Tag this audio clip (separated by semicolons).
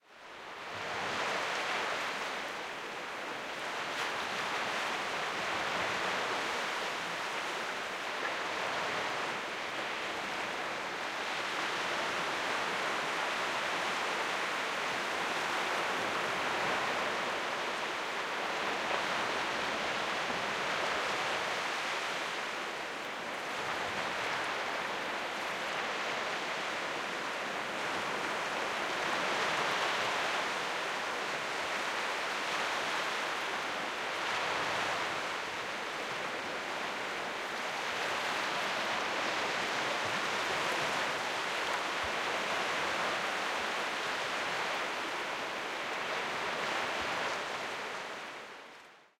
Baltic; beach; field-recording; nature; Niechorze; night; Poland; sea; water; waves